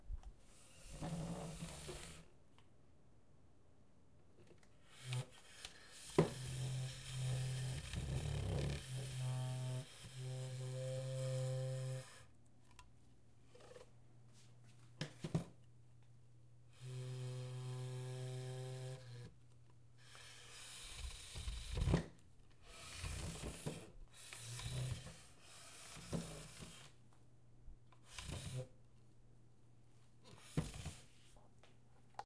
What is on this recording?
scraping chair
Dragging and pulling chair across tile floor.
chair, drag, scrape